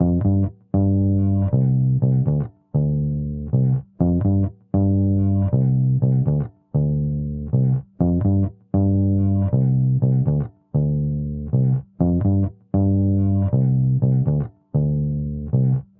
Bass loops 028 short loop 120 bpm
120, 120bpm, bass, beat, bpm, dance, drum, drum-loop, drums, funky, groove, groovy, hip, hop, loop, loops, onlybass, percs, rhythm